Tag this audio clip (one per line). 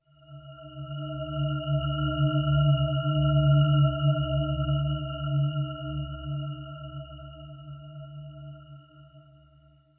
dark
ambient